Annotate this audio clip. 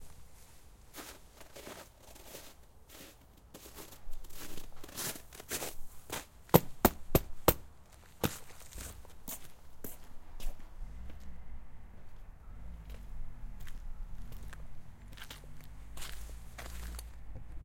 Shoes cleaning
cleaning, walking